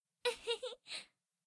Cute Anime Giggle Sound Effect
AnimeGirl, AnimeLaugh, AnimeSoundEffect, Cute, CuteGiggle, CuteGirl, CuteVoice, Female, FemaleLaugh, Giggling, GirlLaughing, Kawaii, Laugh, LaughSound, Laughter, Voice, VoiceActress, Waifu